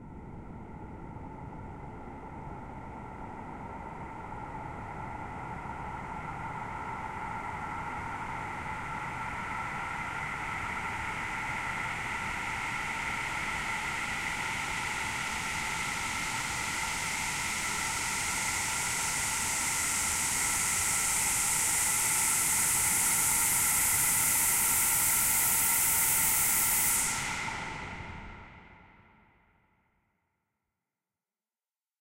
Dance Sweep (135bpm)
Just a simple rising frequency sweep made with Ableton's Operator with some added phasing.
Dance, Effect, FX, Sweep, Trance